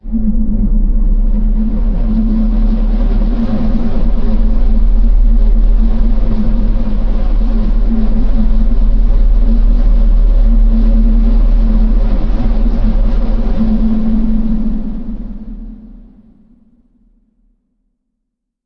nog paal_ soundscape (cavernous audio)